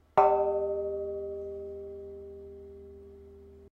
Small chapel bell (editable)
One editable bell strike